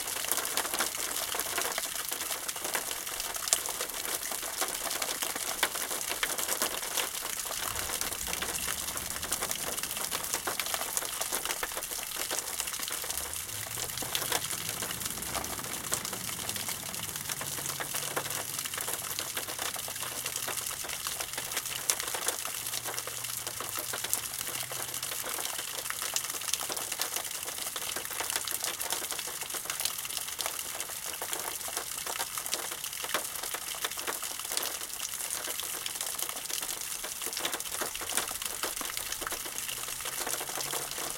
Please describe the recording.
rain in gutter large drops splat
recorded with Sony PCM-D50, Tascam DAP1 DAT with AT835 stereo mic, or Zoom H2